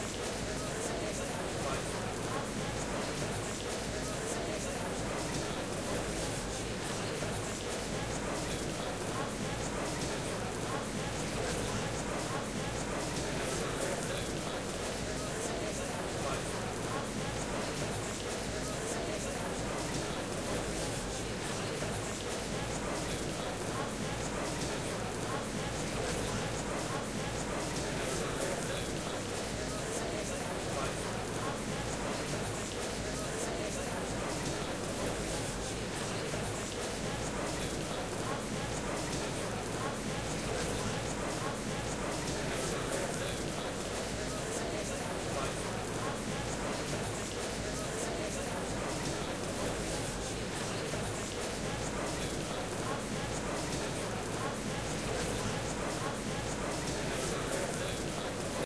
crowd int show movement walla murmur active whispers no steps nice smooth detailed sweetener
recorded with Sony PCM-D50, Tascam DAP1 DAT with AT835 stereo mic, or Zoom H2